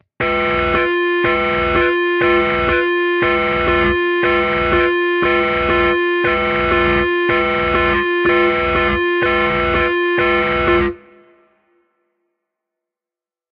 Emergency Alarm
This is essentially a bass guitar with distortion with three layers of notes, merged together to make a "siren" of sorts. It can be used as a fire alarm, or maybe an alarm on a spaceship or UFO.
Emergency, Warning, Fire, Siren, System, Alarm, Alert